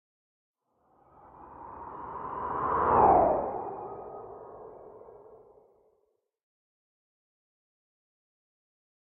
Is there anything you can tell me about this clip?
FX FLASH-003
There are a couple of transitions that I recorded.
FLASH, WOOSH, TRANSITION